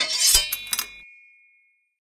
pick up tool
metal, equip, cling, clang